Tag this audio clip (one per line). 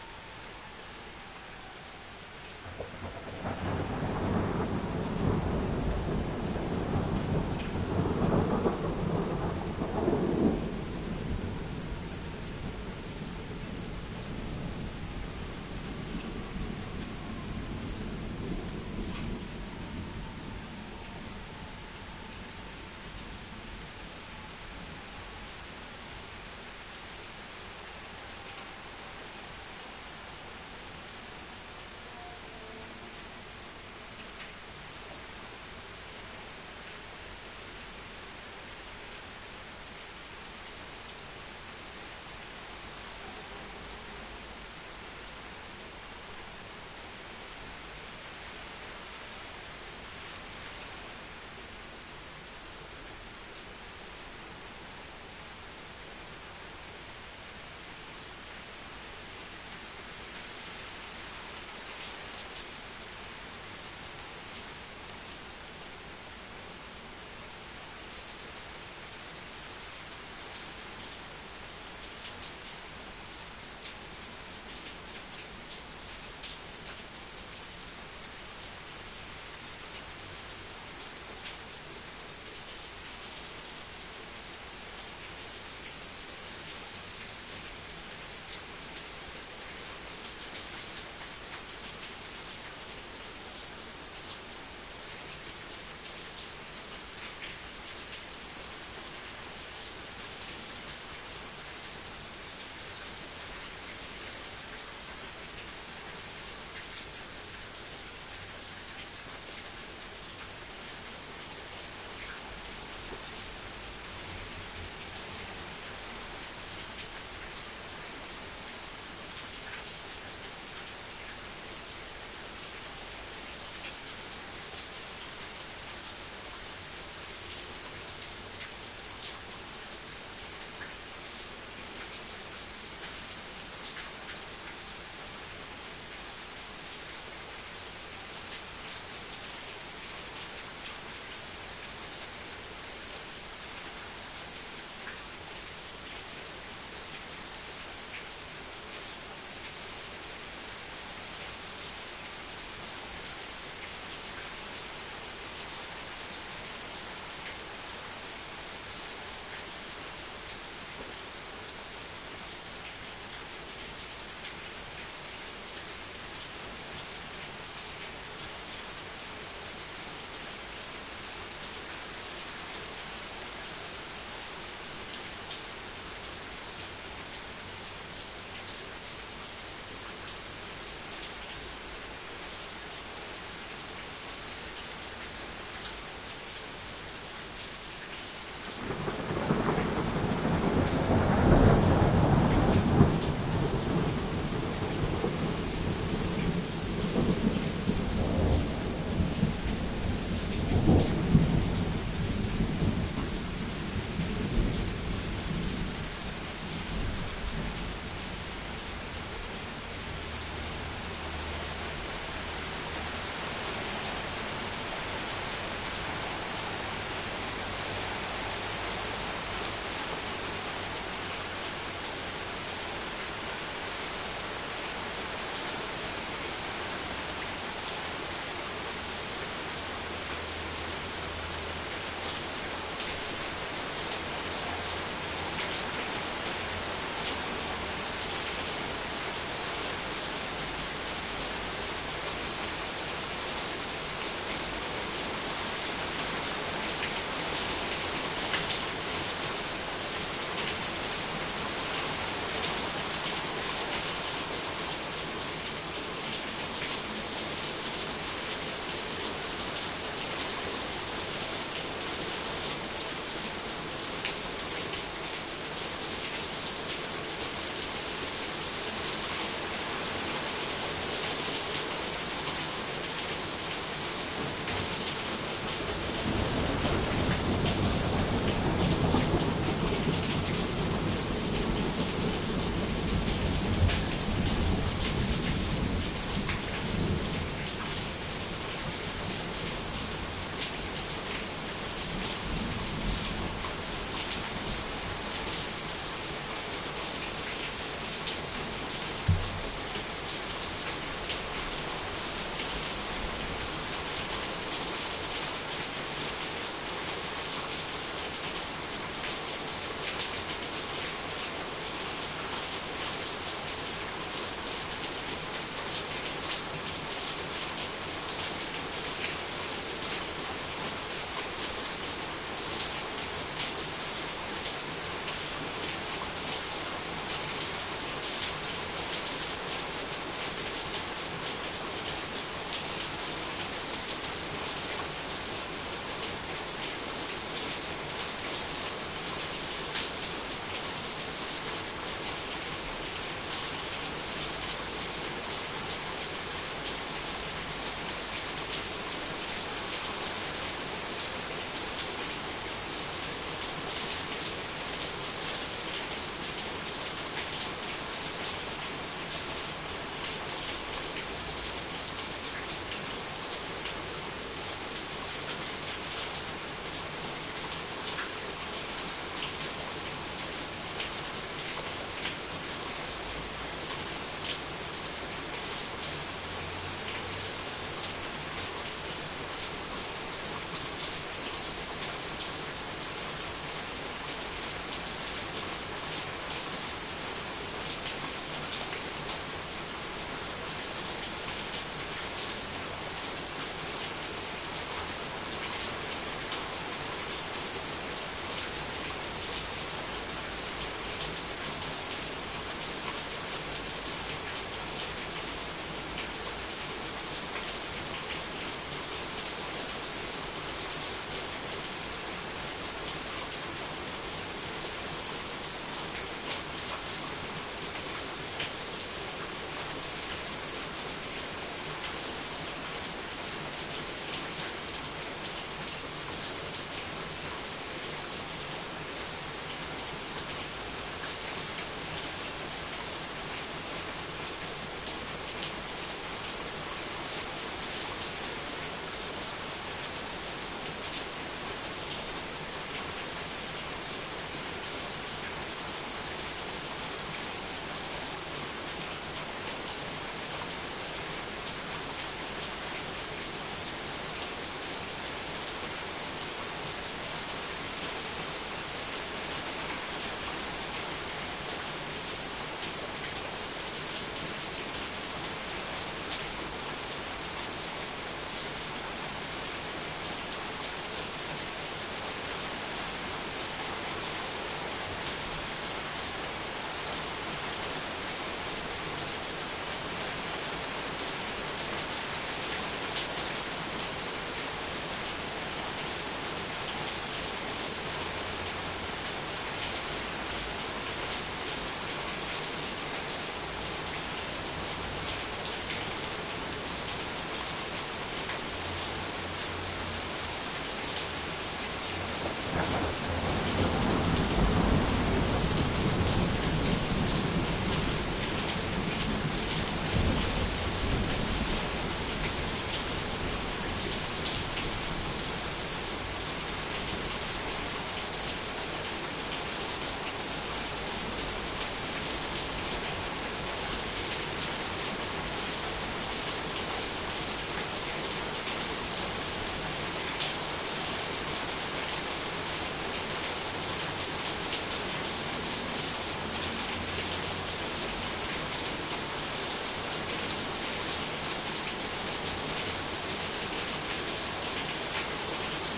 unedited
Thunder
Thunderstorm
Rain
Ontario
Weather
Lightning
Storm
Canada